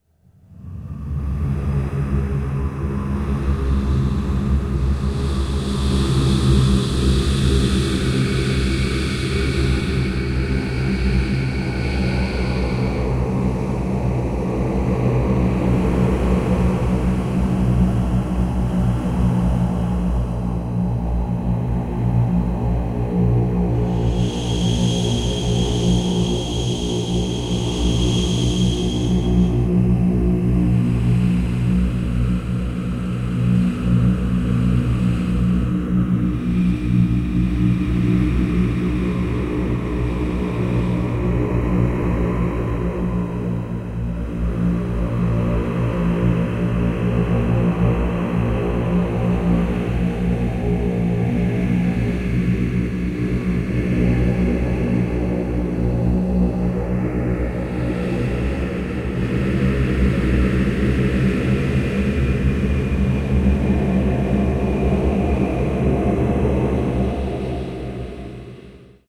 Scattered Ghost
Whistling and howling voices on a holy graveyard. A slow transition, as slow as death comes. Own voice recording, change speed, paulstretch and a little bit of Granular Scatter Processor.
Recorded with a Zoom H2. Edited with Audacity.
Plaintext:
HTML:
action, adventure, creepy, dark, fantasy, fear, feedback, game-design, game-sound, ghosts, horror, noir, noire, role-playing-game, rpg, scary, sci-fi, sinister, spooky, suspense, tense, terror, thriller, transition, video-game, voices